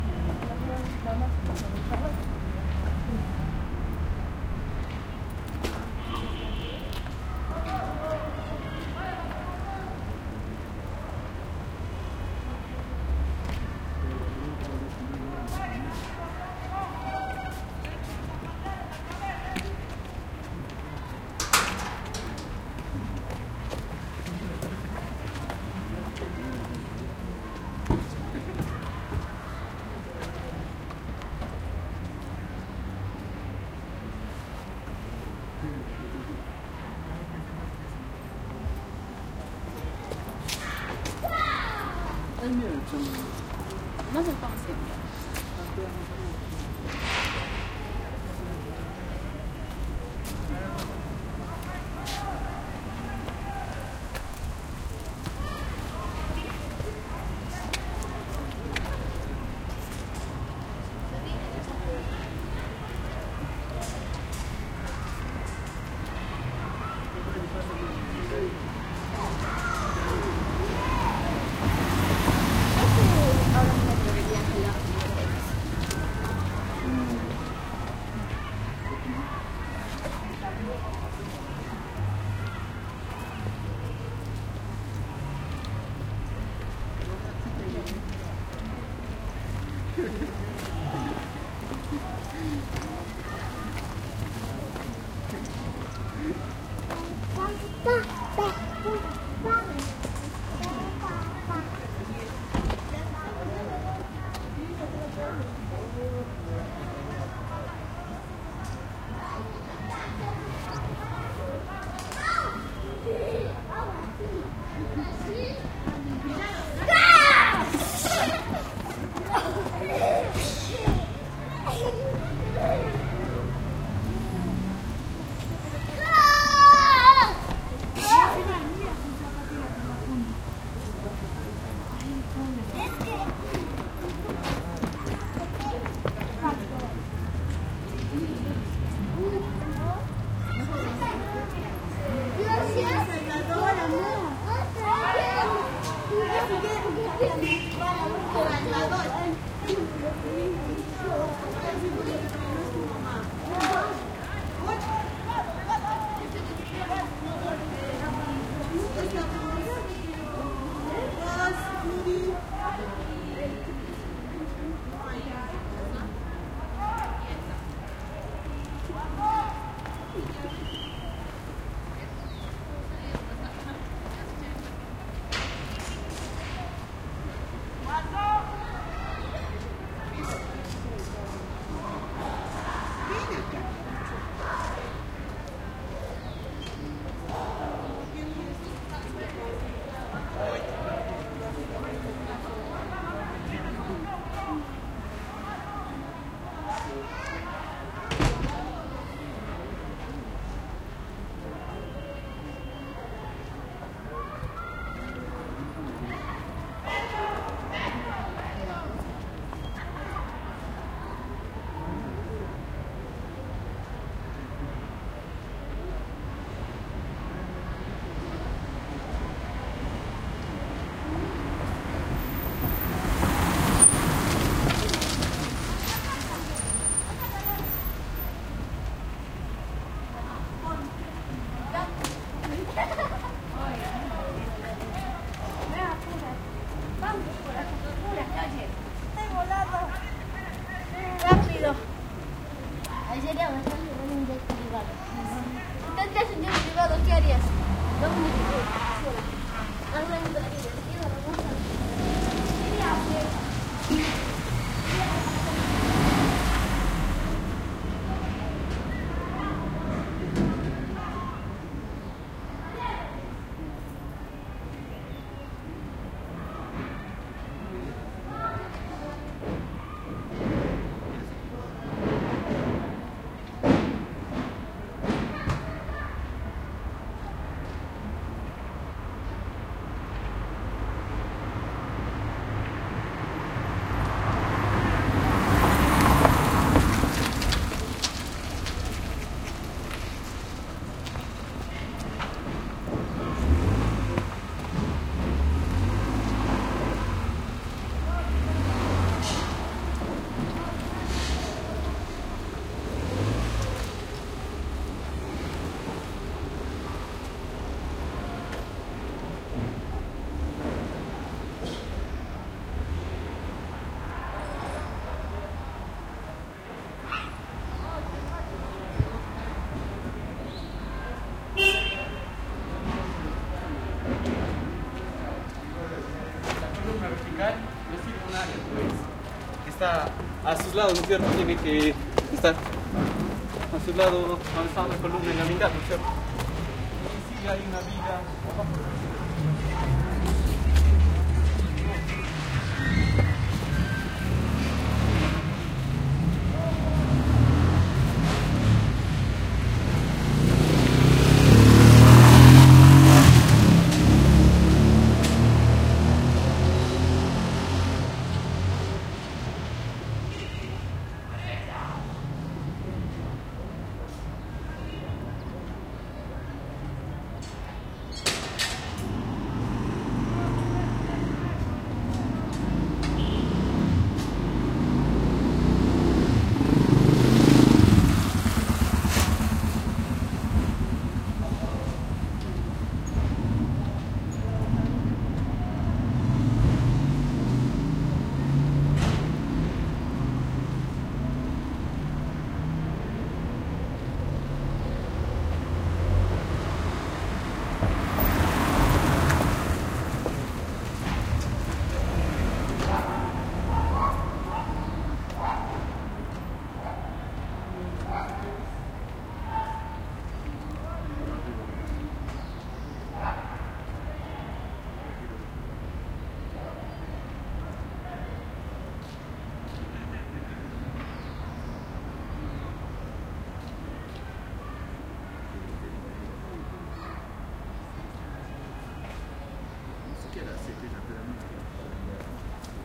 side street small residential city square calm people activity motorcycle pass cars auto fast gritty pull ups and back up good detail +gate close left start Cusco, Peru, South America